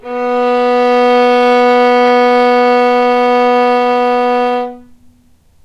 violin arco non vib B2
violin arco non vibrato
arco, non, vibrato, violin